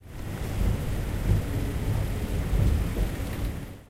Sound of an escalator mechanism in big car park (noisy and reverberant ambience).
amchine, campus-upf, centre, comercial, escalator, glories, machine, mall, motor, park, parking, payment, shopping, UPF-CS13